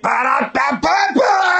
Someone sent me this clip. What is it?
Mac sms1
A man sings a song of Mcdonalds.
The guy loves McDonald's very much, so he hums their advertising slogan.